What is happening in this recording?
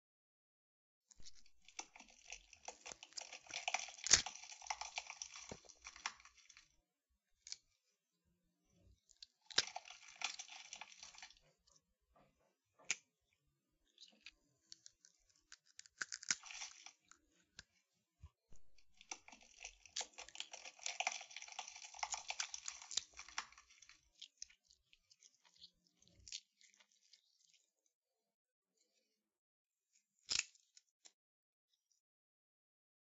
Someone being gutted
Gory wet ripping, pulling apart. Recorded with zoom h6 in mono
slimy, squishy, gore, wet, Guts, OWI